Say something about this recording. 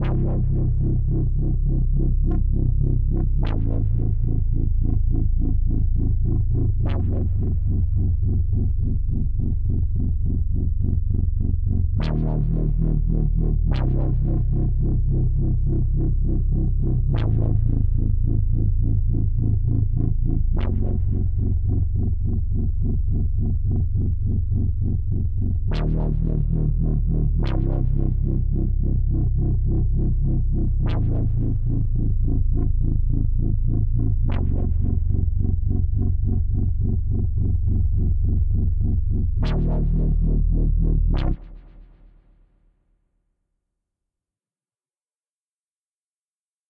time night mares bass
70, 140, neurofunk, wobble, techno, bass, psytrance, breaks, dubstep